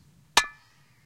sound of stones